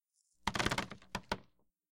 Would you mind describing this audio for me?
rock drop-nuts#2
Approximately 10-15 T-Nuts with teeth were dropped onto a table, in a variety of rhythms.
All samples in this set were recorded on a hollow, injection-molded, plastic table, which periodically adds a hollow thump to each item dropped. Noise reduction applied to remove systemic hum, which leaves some artifacts if amplified greatly. Some samples are normalized to -0.5 dB, while others are not.
rock, thud, thumb, t-nut, donk, drop, pelt